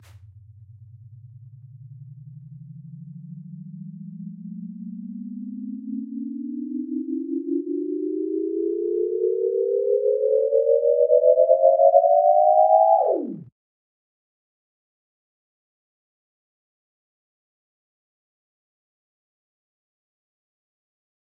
Power Overload
voltage, electricity, power